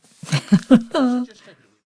CLOSE FEMALE LAUGH 019
A well-known author visited the studio to record the 'audio book' version of her novel for her publisher. During the 16 hours (!) it took to record the 90,000 word story we got on really well and our jolly banter made it onto the unedited tracks. The author has given me permission to keep and share her laughter as long as I don't release her identity. Recorded with the incredible Josephson C720 microphone through NPNG preamp and Empirical Labs compression. Tracked to Pro Tools with final edits performed in Cool Edit Pro. At some points my voice may be heard through the talkback and there are some movement noises and paper shuffling etc. There is also the occasional spoken word. I'm not sure why some of these samples are clipped to snot; probably a Pro Tools gremlin. Still, it doesn't sound too bad.
close, humour, jolly, voiceover